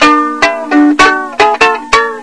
A tourist version of a moonlute, with classical guitar nylon strings. 5 note improv
Recorded at 22khz
moon-lute, strings, chinese-musical-instrument